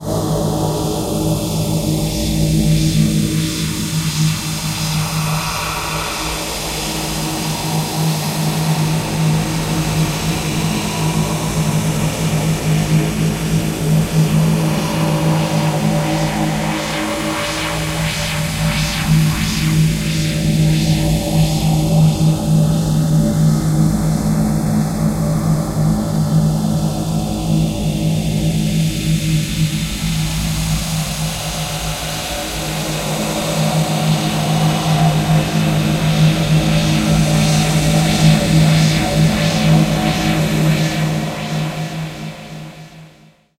Scary Halloween sound
A droning science fiction or haunting horror spooky droning sound with a wahwah fading in and out several times. Great for Halloween.
Recorded on a Samsung Galaxy S3 (processed in Audacity)
creepy, dark, drama, dramatic, drone, Gothic, haunted, haunting, horror, phantom, scary, sci-fi, sinister, space, spooky, suspense, terrifying, terror, weird